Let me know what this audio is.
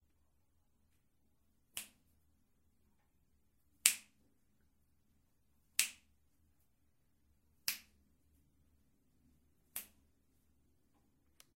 A set of finger claps
sounds, gesture, FINGER-CLAP